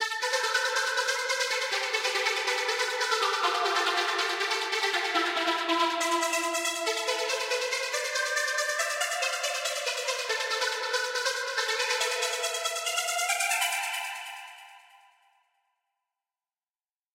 A trancy melody.